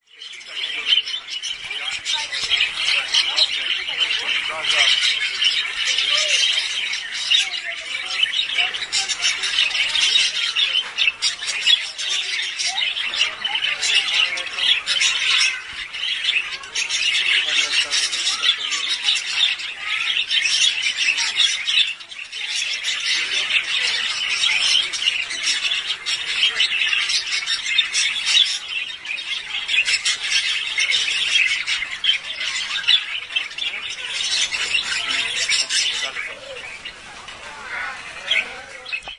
20.09.09: about 18.30 in The New Zoo in Poznan. The place called The Children Zoo. The sound of the colony of budgerigar and lovebirds.
budgerigar, cage, children, lovebirds, poznan, squawk, squawking, squeal, squeling, zoo